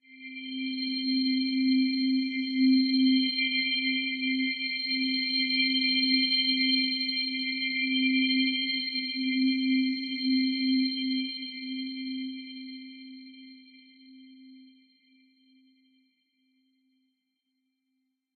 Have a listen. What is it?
metal-ring
a strange magical feeling synthesized sound